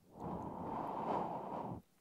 Blowing air to cool coffee